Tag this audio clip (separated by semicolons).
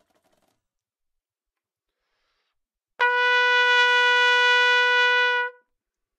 B4; neumann-U87; single-note; trumpet